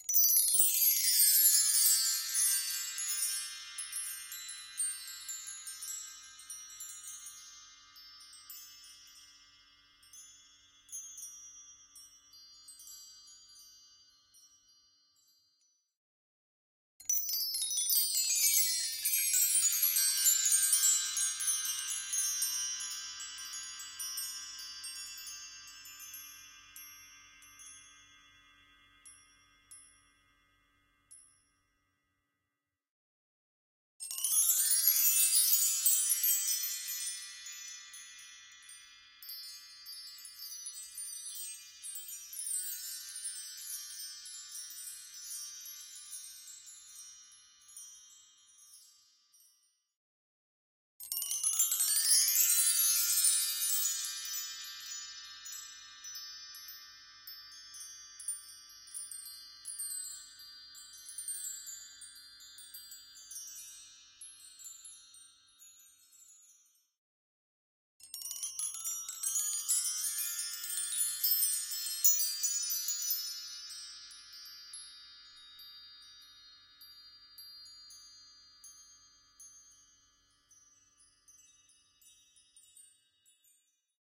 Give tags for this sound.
metal; bells

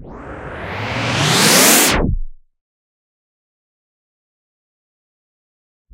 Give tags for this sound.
bump; riser; sweep

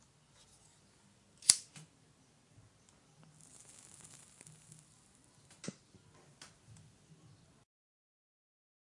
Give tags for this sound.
cigarette; smoking; smoke

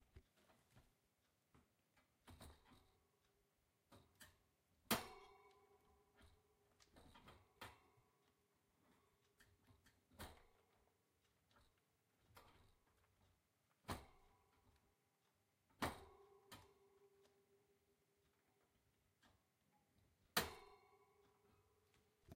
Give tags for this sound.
fermer; open

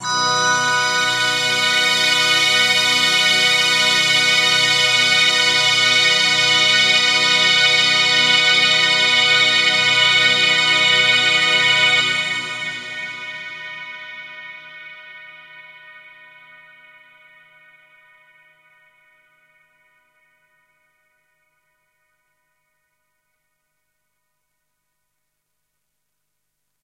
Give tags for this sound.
Instrument; Orchestra; Space

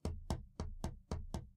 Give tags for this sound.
wooden; finger; drumming; table; wood

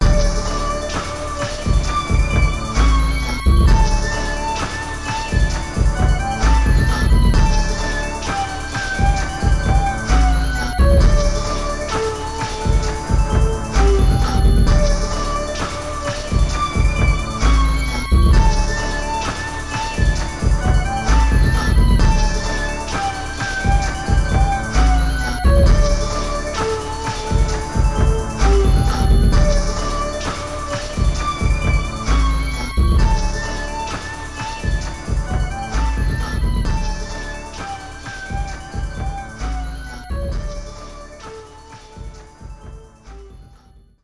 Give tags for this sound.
teenage-engineering; sample; music; song; synth; 130bpm; op-1; happy; lo-fi

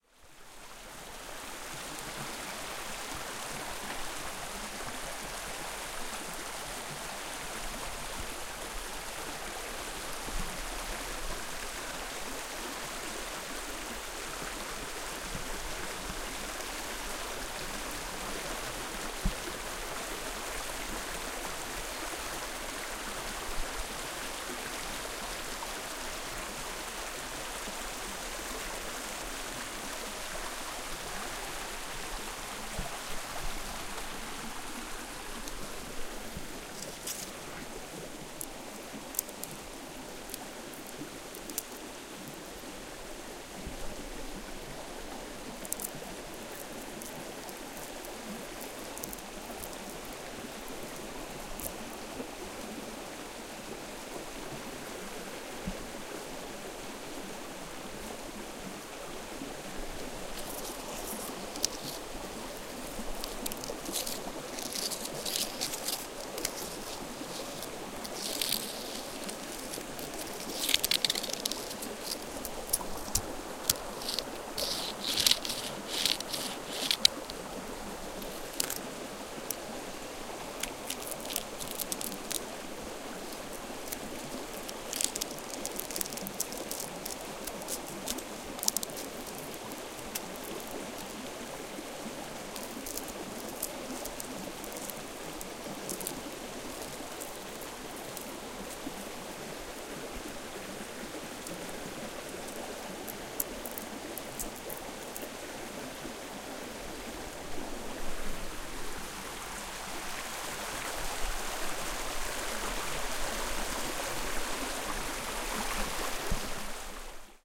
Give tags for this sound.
ambient; brook; california; creek; field-recording; flow; liquid; nature; relaxing; river; stream; water

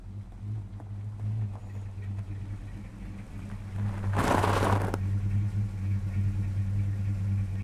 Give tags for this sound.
approach; dirt; exterior; pickup; skid; truck

